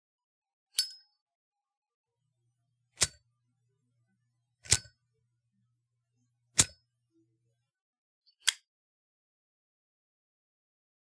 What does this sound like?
A recording of myself opening, igniting and closing a Zippo lighter. Recorded using a Rode M3.
fire, flame, ignition, lighter, smoking, spark, zippo